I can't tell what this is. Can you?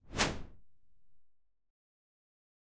whoosh short high
A simple whoosh effect. Short and high.